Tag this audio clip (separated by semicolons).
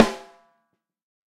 13x3; c720; drum; fuzzy; josephson; multi; sample; snare; tama; velocity